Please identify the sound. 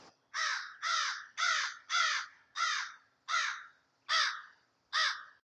Crows-Clean mixdown
An H4N recording of a crow found on my University campus